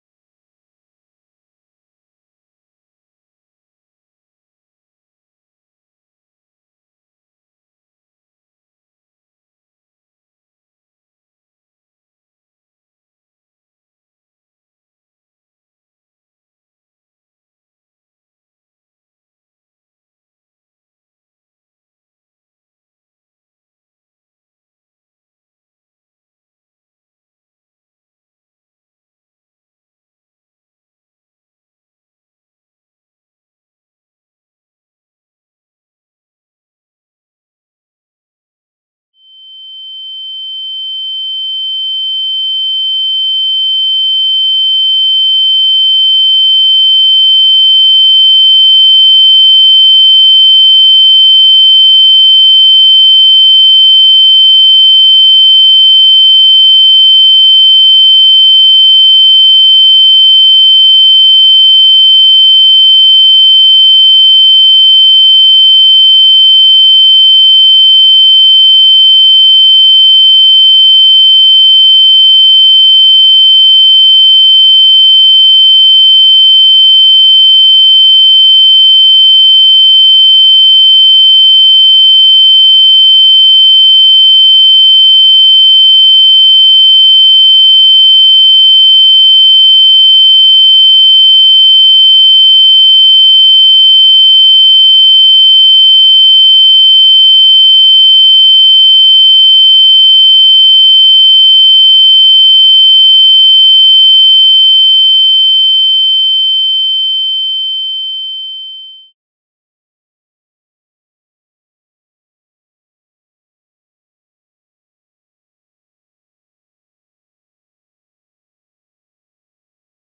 Ear Ringing Sound what you get if you are damaging your ear or just heard a loud sound or strong explosion. consistent monotone. made using software.